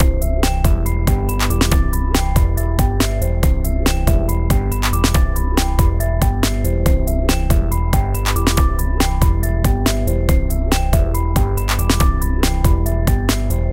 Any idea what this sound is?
C Major Happy Loop 140bpm 4/4
140, bpm, c, happy, loop, major